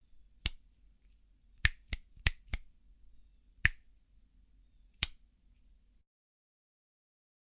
finger snap sound
152, Finger, Music, snap, Garcia